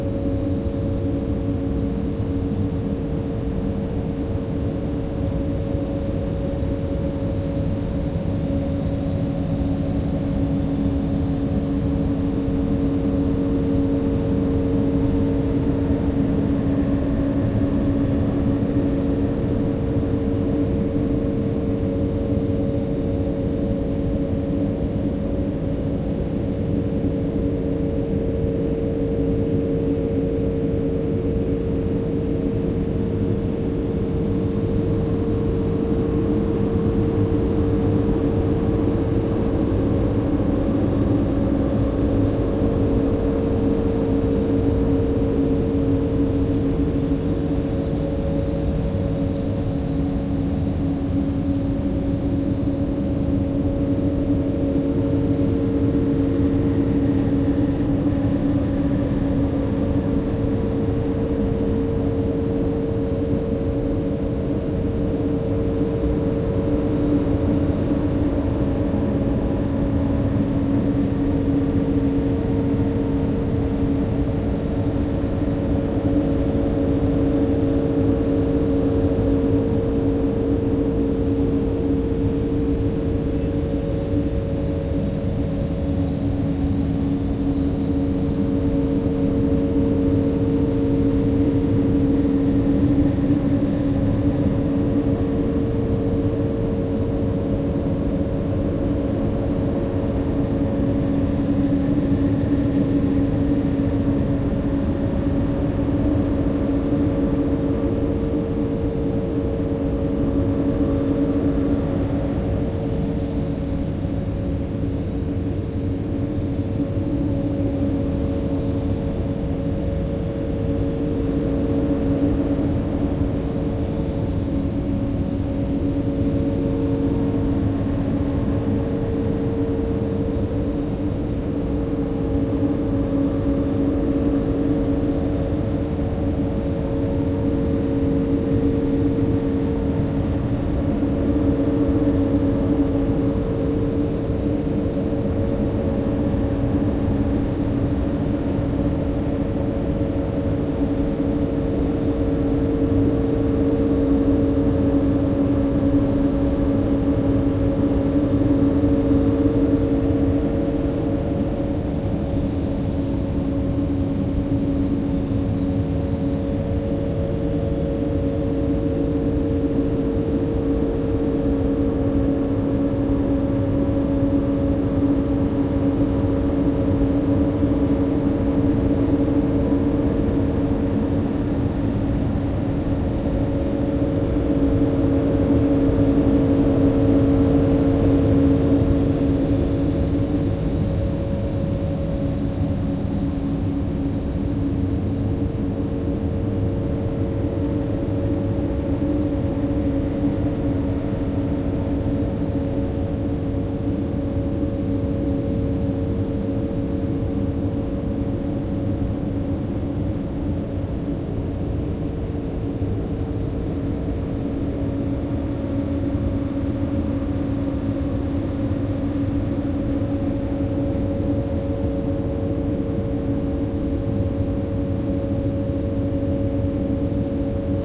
Recorded with Sony M10 from the basement of my house.